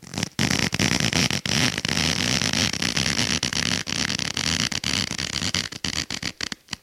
Creepy Shoe Sound
creepy, shoe